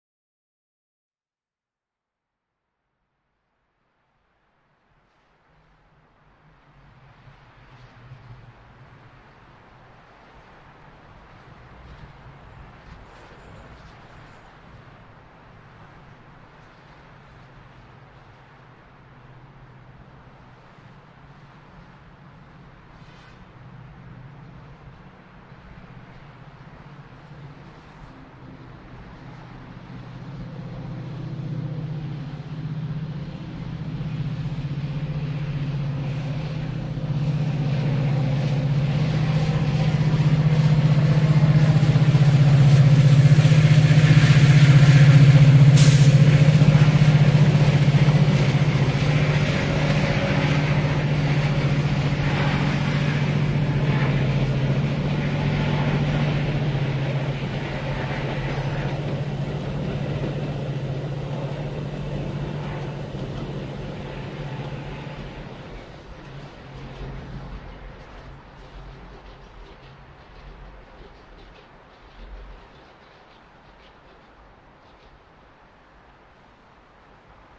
Train passing on a raised bridge in Chicago
General Chicago ambience just outside the downtown core from a fifth floor balcony as a passenger train passes slowly by.
Recorded with a ZoomH6.
Cars, City, Night, Public, Street, Traffic, Train